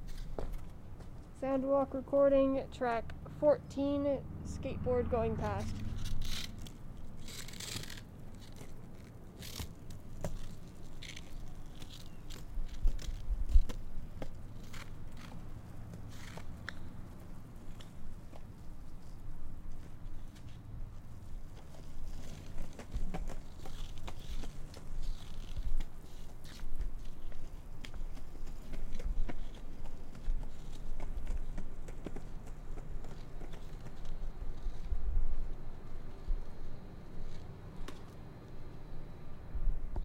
Sound Walk - Skateboard
Skateboard going past
skate, skateboard, skateboarding, wheels